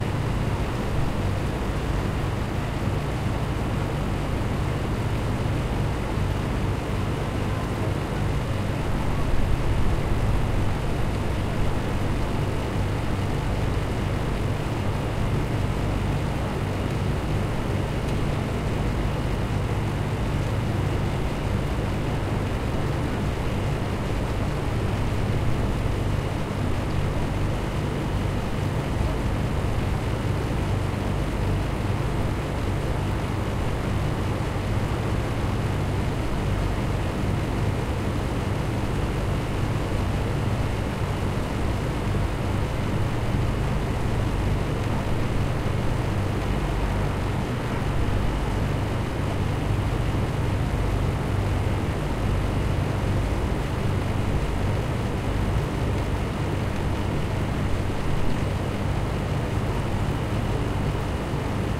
Hum of air conditioning split-system (outdoor part).
Recorded 2012-10-13.